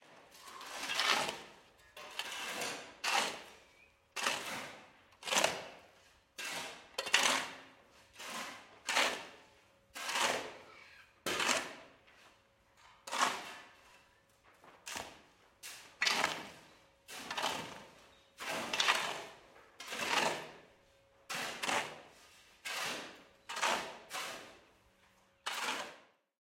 Mixing Concrete
Hand mixing of concrete cement for a construction site
shovel, mixing, construction, cement, building, concrete, tools, construction-site, sand